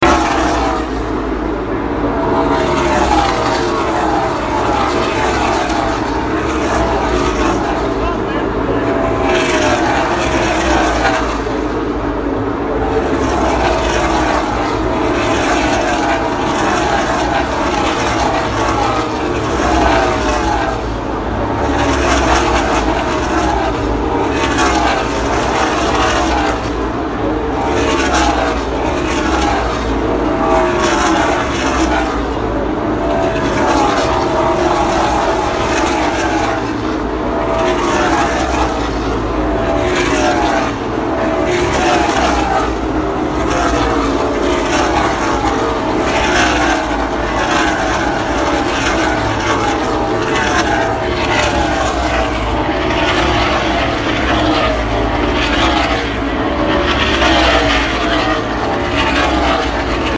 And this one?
NASCAR Race
A recording I took at the 2018 NASCAR Monster Energy Cup Series race at Kentucky Speedway. I haven't edited this file at all; it is straight from my cell phone.